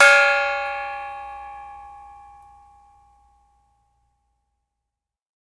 A small gong around 6 inches across hanging in a wooden frame stuck with a black plastic mallet at various ranges with limited processing. Recorded with Olympus digital unit, inside and outside of each drum with various but minimal EQ and volume processing to make them usable.
mini, percussion